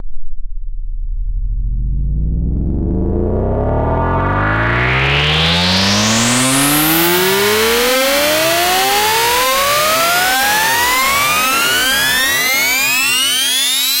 Riser made with Massive in Reaper. Eight bars long.